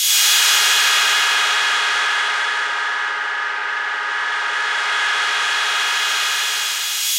Analog Ocean
Flowing, metallic ambiance meant to loop. The only source material was an 808 cymbal.